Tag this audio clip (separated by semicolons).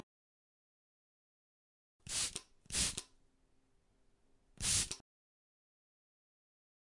bathroom; cosmetics; CZ; Czech; gupr; Panska; some; spraying